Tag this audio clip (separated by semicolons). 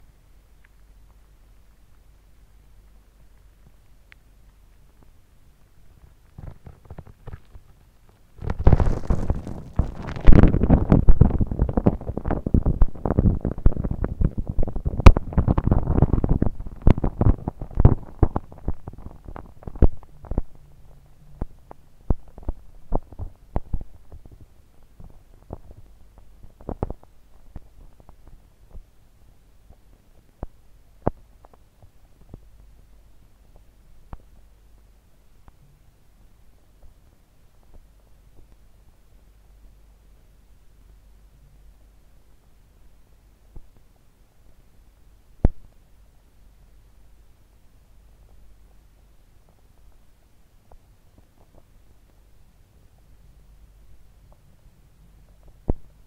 interference; city; sewer